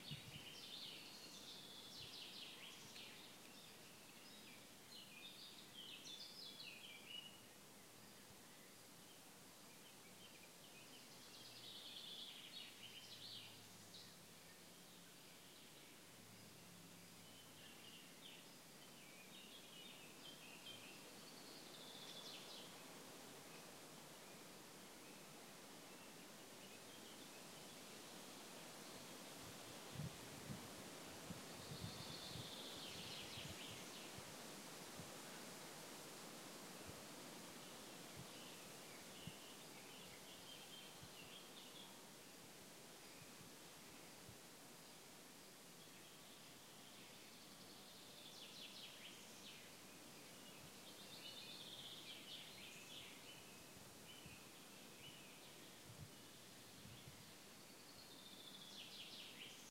Forest ambience
Taken on a summer day at Dobogókő in the forest.
Forest, wood, Dobogok, birds